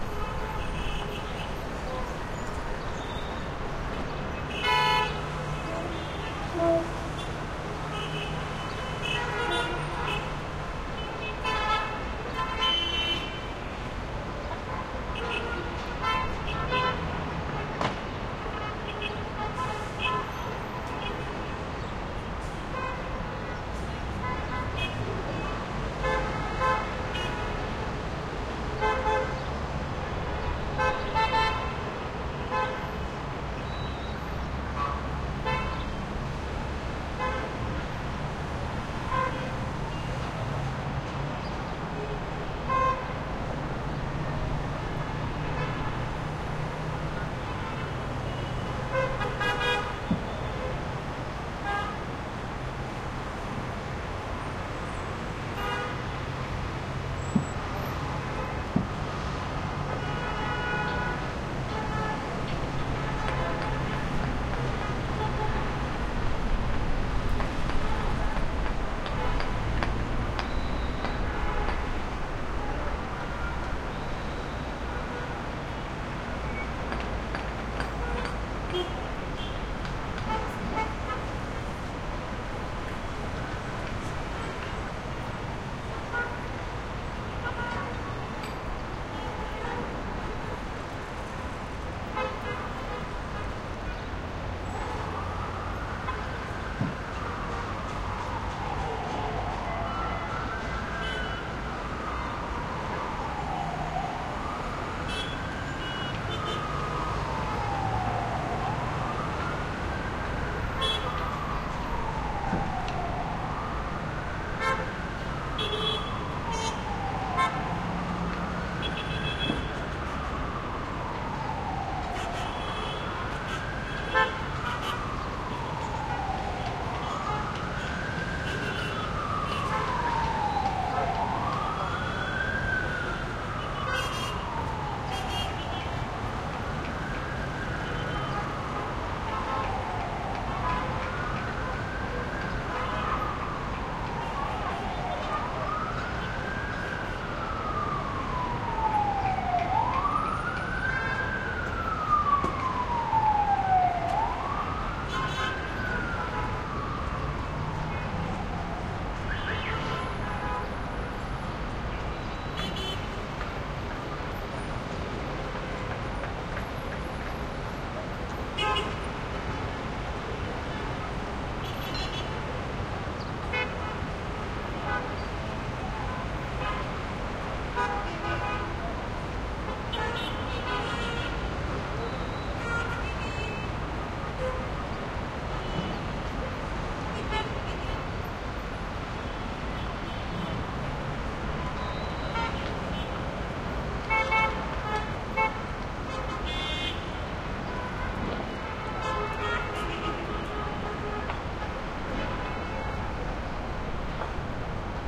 skyline Middle East distant traffic horn honks and city haze08 from side of building with closer traffic +ambulance siren echo cool middle Gaza 2016
East, Middle, city, distant, haze, honks, horn, skyline, traffic